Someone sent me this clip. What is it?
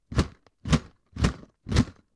1 bar of a toolbox close mic'd and playing at 114bpm
clunk, toolbox, tools